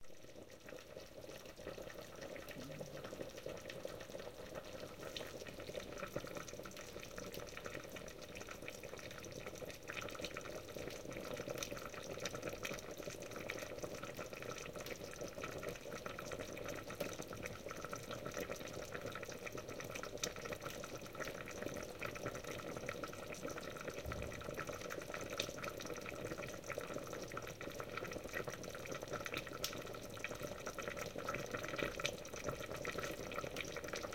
boiling pot good for kitchen sounds